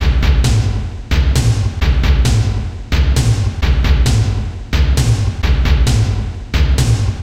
Thank you, enjoy
beats, drum-loop, drums